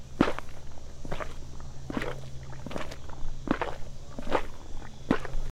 Footsteps Mud 01
field-recording, mud